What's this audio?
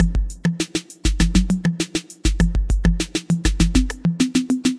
A 100 BPM, 2 measure electronic drum beat done with the Native Instruments Battery plugin